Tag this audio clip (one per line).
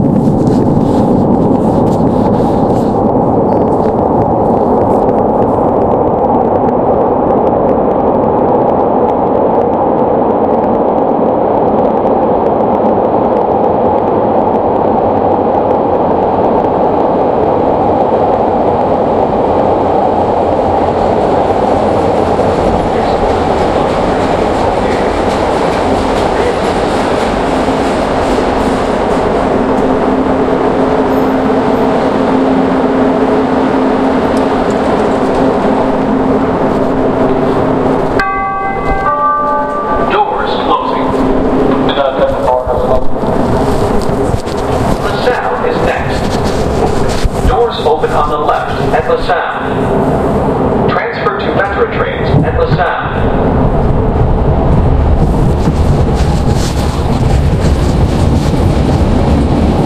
authority
blue-line
chicago
chicago-transit-authority
clinton
CTA
elevated
el-train
lasalle
train
transfer
transit